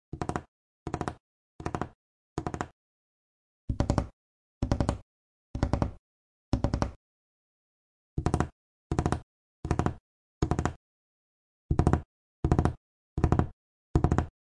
fingers drumming on wooden table (clean)
4 versions of me sharply drumming my fingers on my desk
1: unmodified
2: slowed down
3: bass boosted
4: bass boosted more
(Recorded with Blue Yeti and edited in Audacity)